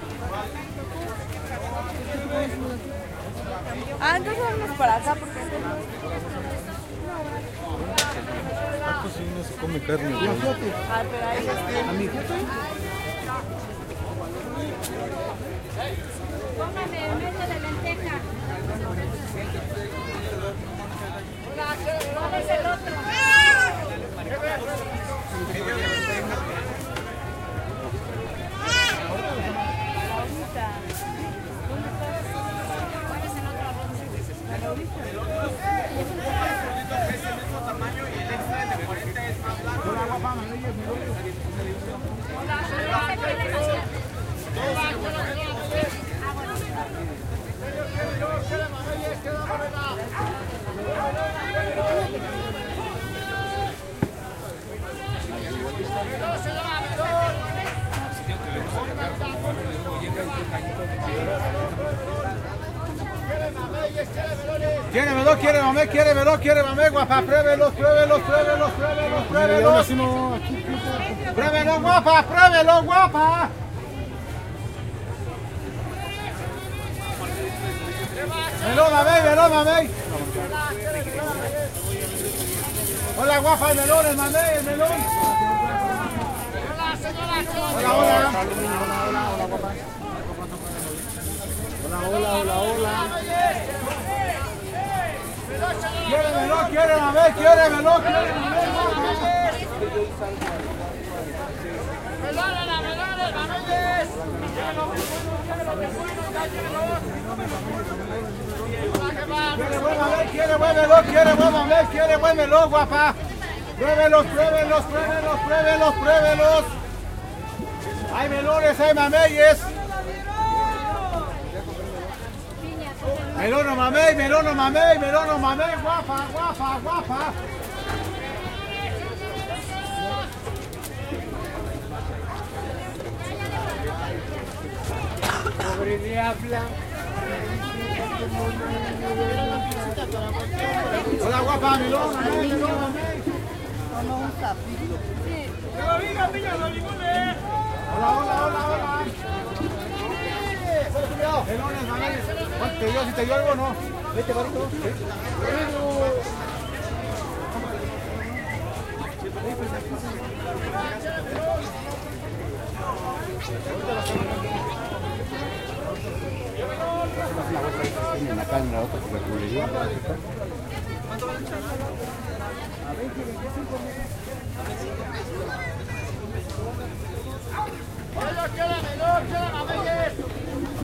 Tianguis 3 (Melon Mamey)
Tianguis (Market) Atmosphere in méxico city.
ambient, atmosphere, general-noise, market, salesman